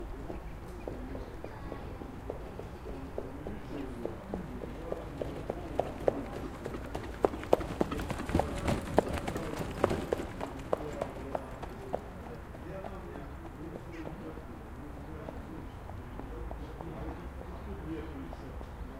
hippodrome.warm up horses
Horses warm up before race.
Recorded 2012-09-29 12:30 pm.
hippodrome, horse, race, racetrack